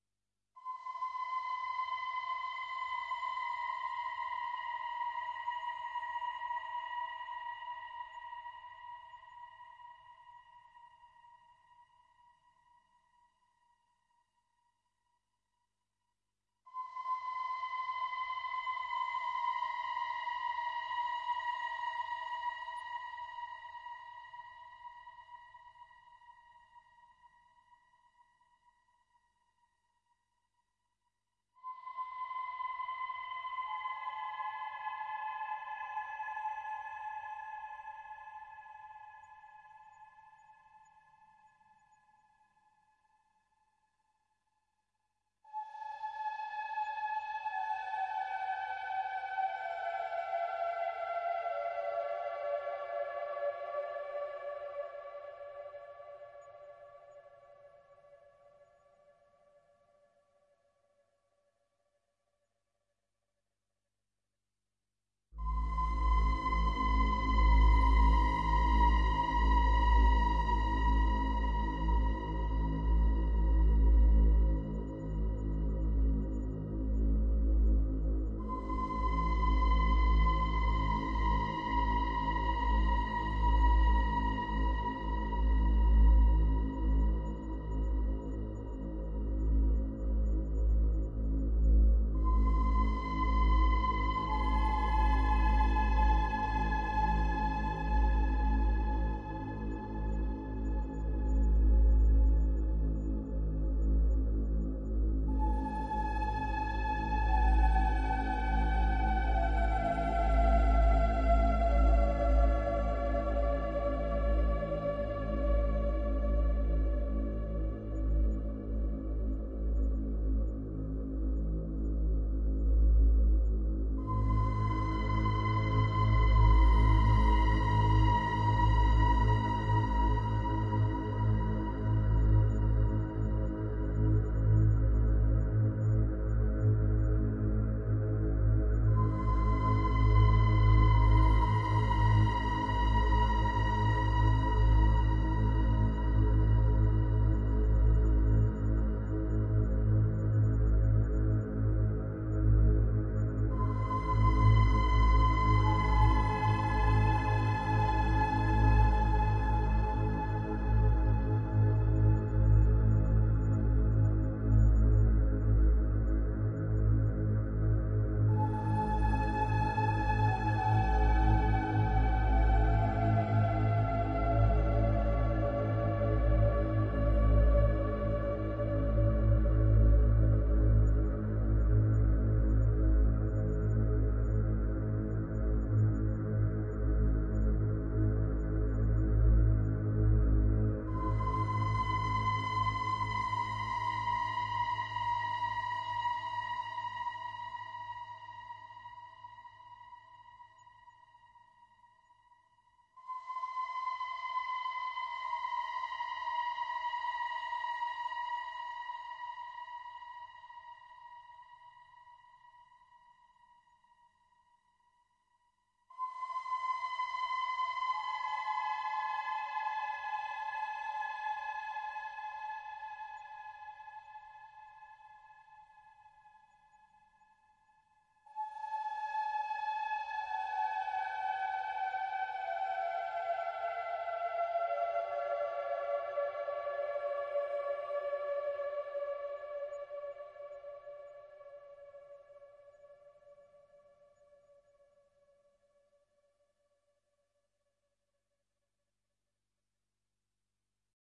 lonely music #3
Dark music to give the feeling of loneliness.
drone, lonely, melancholic, music, sad, slow, synthesizer